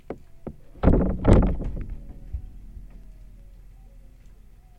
patio door02
Opening the door of a covered patio